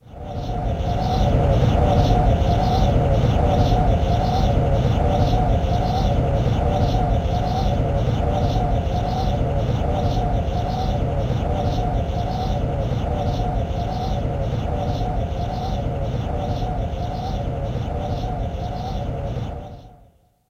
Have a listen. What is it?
Sampled from my beloved Yamaha RM1x groovebox (that later got stolen during a break-in).